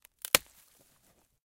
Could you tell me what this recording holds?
single small branch snapping, close up, high frequency, H6
break, close-up, crack, dry, one-crack, short, snap, split, wood